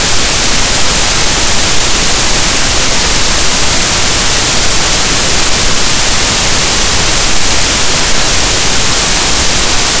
Noise Mix
Created in Audacity using my all downloaded noises
Brown, Mix, Noise, Pink, Violet, White